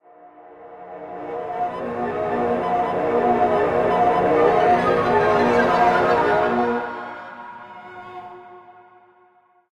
gaggleofGeese(UnEQED)
Recordings of me performing harmonics on my cello. Enjoy!
ambiance, ambient, Cello, field-recording, Harmonics, samples